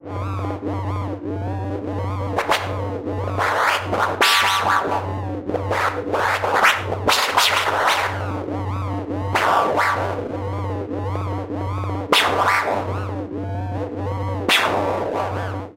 Micbl28rev4
Low, modulated hums with erratic zaps. Made on an Alesis Micron and processed.
zaps, synthesizer, micron, hum, alesis